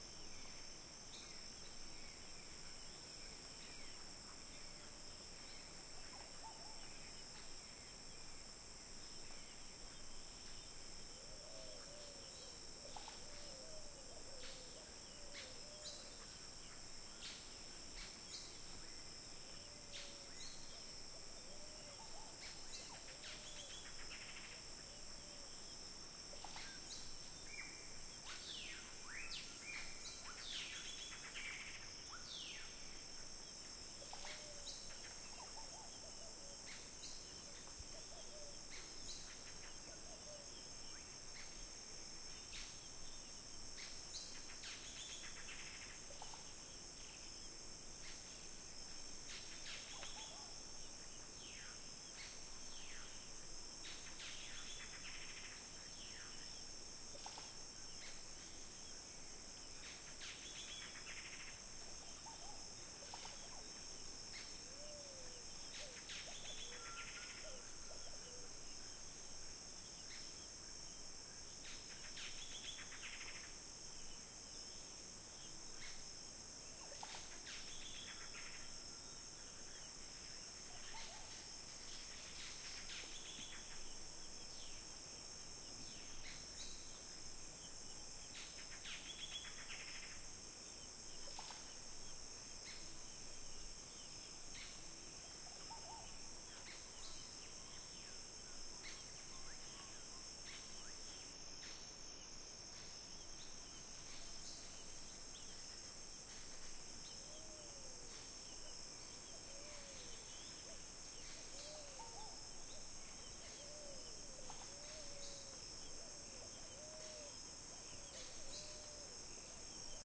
Night valley jungle
Jungle during a quite night in a small valley in the sierra mazateca (Mexico). Birds, insects and wind in leaves.